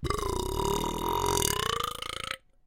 A long deep burp that gets louder.
A studio recording of my friend Cory Cone, the best burper I know. Recorded into Ardour using a Rode NT1 and a Presonus Firepod.
burp, belch